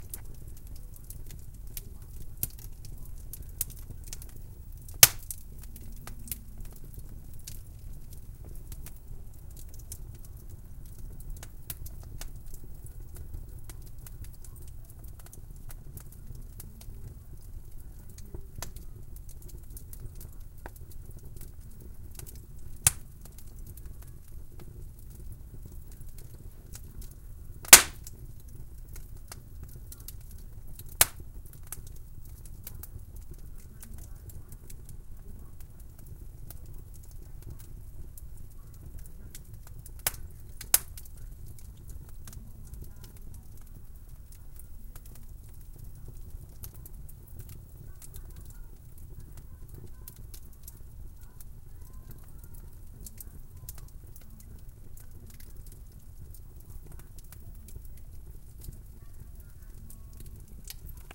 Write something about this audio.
Field recording of wood burning in my fireplace.
field-recording, fire, fire-wood